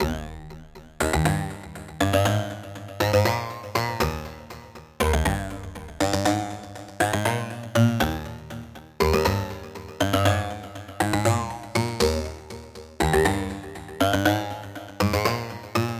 Happy sounding little riff that's quite off beat and nonchalant. Noise phaser synth. 4/4. 120 bpm. 8 bars in length.
happy, bounce, noise-phaser, synth, offbeat, nonchalant, off-beat